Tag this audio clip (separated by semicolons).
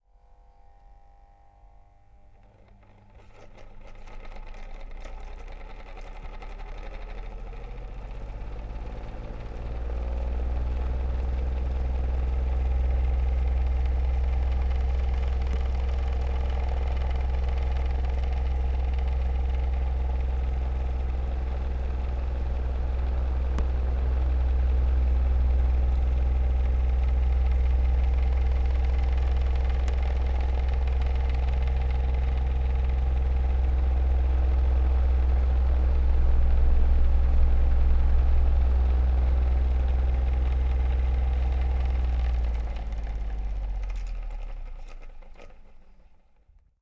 Fan; mechanizm; motor; old